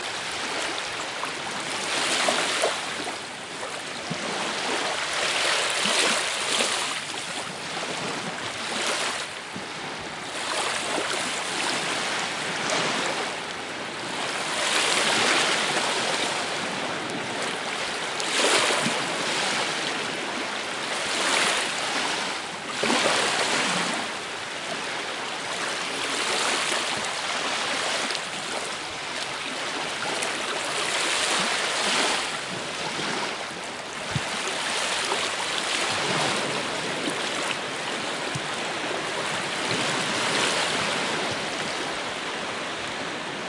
BEACH SMALL SAND DISTANT
small sandy beach
atmosphere, beach, small